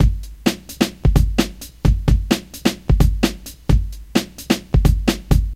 wonder break
my first of many break beat sample
drums, rave